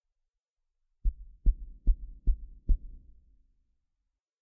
heavy knocking
Knocking on a door to a big empty room, from the inside of the room.
Knocking, empty